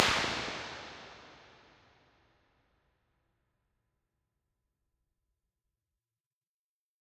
BX Spring 03
Impulse Response of a Swiss made analog spring reverb. There are 5 of these in this pack, with incremental damper settings.
Impulse, IR, Response, Reverb, Spring